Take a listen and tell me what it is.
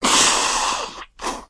Blowing a nose
sick, blowing, cold, blow